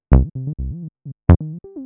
Loops generated in Propellerhead Reason software.